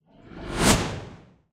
simple whoosh 001
Simple designed whoosh
Sweep, transition, Whoosh